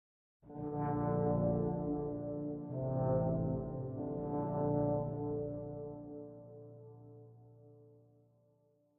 It samples String Quartet No. 12 in F Major, Op.